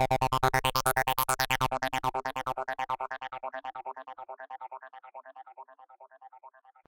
its a keeper
acid fx sweep